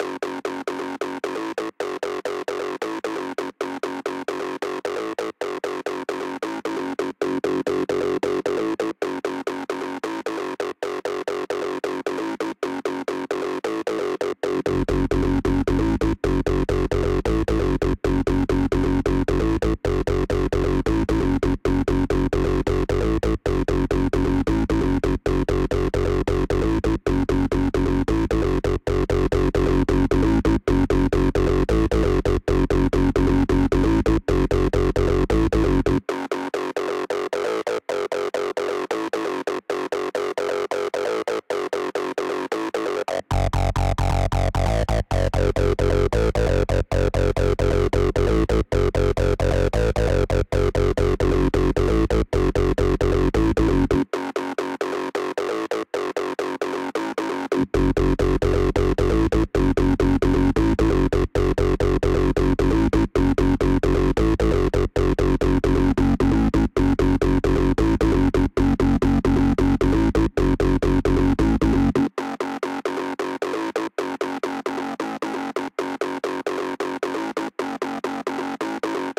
let the organ do the talking (blade style)
acid like remix of let the organ do the talking, recorded in ableton with sylenth synth
808, 909, ableton, acid, bass, bassline, electronic, groove, loop, organ, remix, sylenth, synth, synthesizer, synthsizer, technobalde